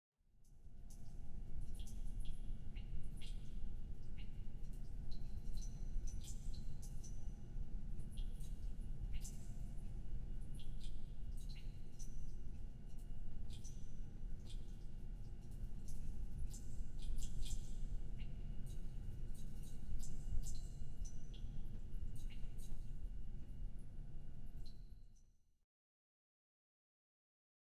43 hn antexplodes
Ants exploding. Made with water drips in layers.
ant, cartoon, explosion, insect